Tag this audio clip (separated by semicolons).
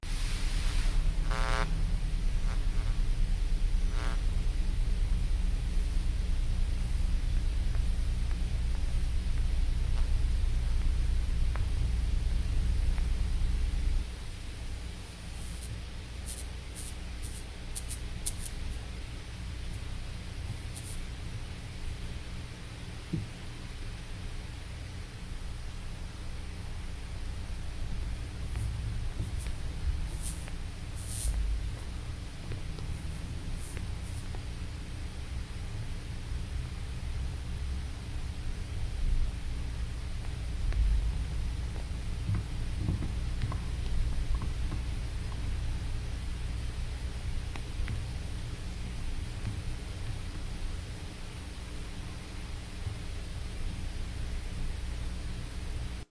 electronic; Interference; cable; xlr; glitch; noise